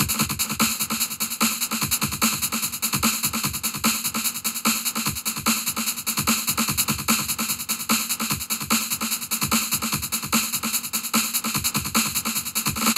This is a Add-On Loop for "TheDream".If you listen to this it will sound like Start and End has been cut off, but if you Loop this it will work well.I used a lot of echo and Phaser for this one.
148bpm, dance, loop, phase, synth, techno, trance